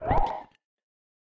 as always, sounds are made on linux using the various softsynths and effects of the open source community, synthesizing layering and processing with renoise as a daw and plugin host.